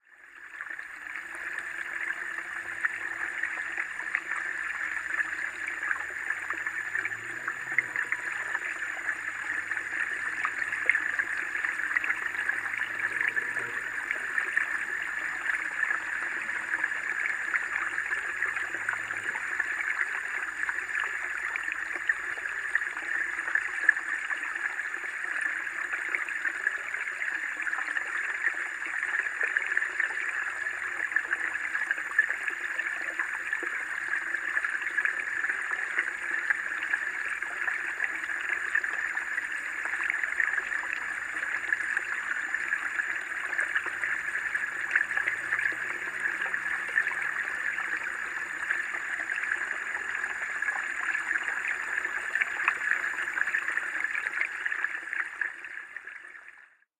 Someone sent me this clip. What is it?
Hydrophone Newport Footbridge Fountains 06
eerie, hydrophone, submerged, strange, bubbles, water